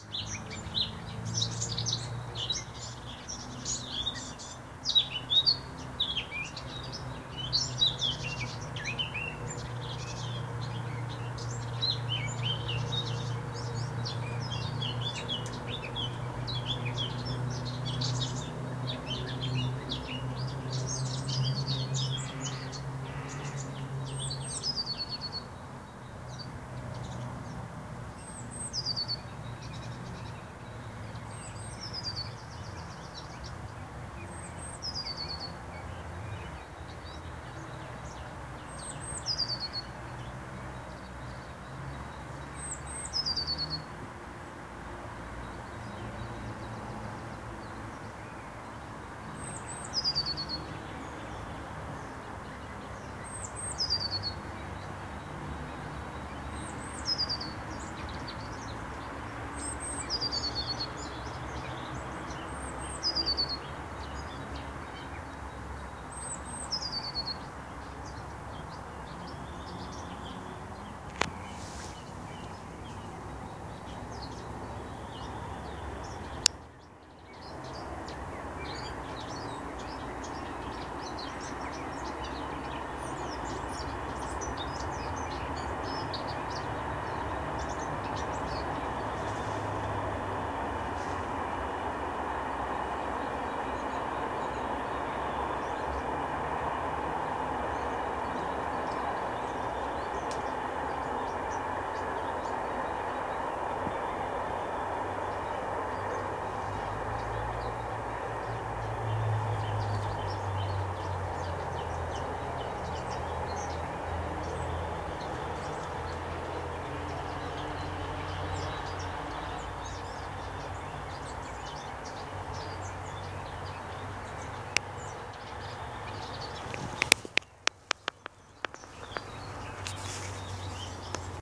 Riverside ambiance 1
birds, nature, ambience, spring, ambient, bird, ambiance, field-recording, forest, birdsong